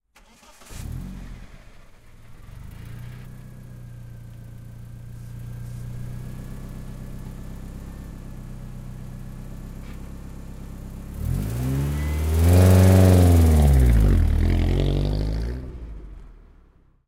Auto motor arrancar/car start engine
Arranque de un auto Neón customizado
drive, motor, car, auto, engine, vehicle, start, automobile, arrancar